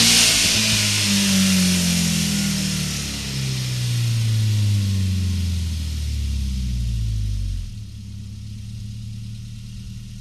Short "deceleration" sweep featuring a Mercedes-Benz 190E-16V. Mic'd with an Audix D6 1 foot behind the exhaust outlet, parallel to the ground.
benz, car, dynamometer, dyno, engine, mercedes, vehicle, vroom